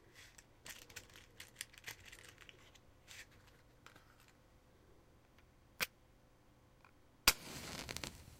Recorded with a ZOOM H2 digital recorder, snippet created using the audacity software. Home brew recording created right at my desk for an old super-8-movie ("Established") that I am re-editing and giving it a new soundtrack.